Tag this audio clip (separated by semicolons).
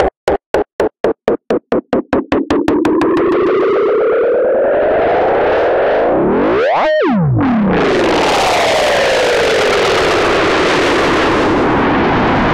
zap; kick; warp; lfo